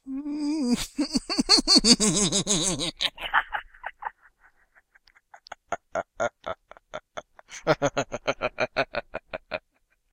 Evil Laugh 3
cackle, demented, evil, halloween, laugh, maniacal